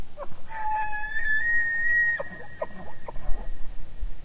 A sample of a bull elk bugling during the fall rut in Colorado